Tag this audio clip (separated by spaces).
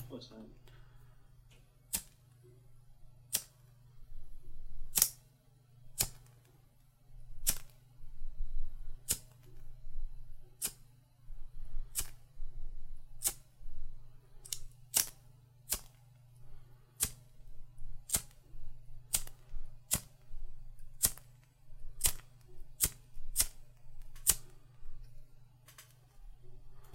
classic flame